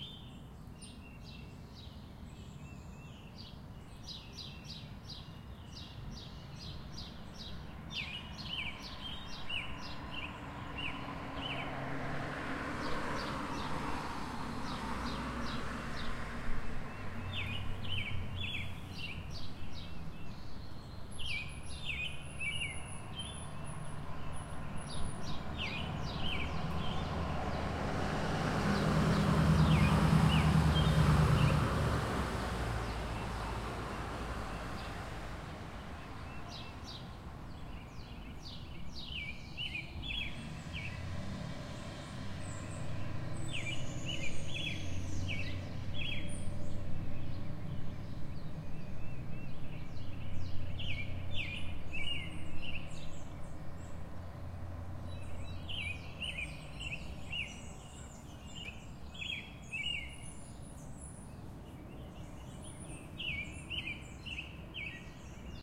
Birds Singing and Traffic
Sound of traffic and birds singing outside my home office window in Virginia. Recorded with a Tuscan DR-40.
ambiance, birds, field-recording, nature, summer, traffic-sounds, Virginia